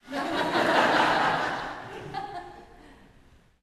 Crowd small Laugh